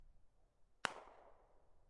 The sound of a glock21 being fired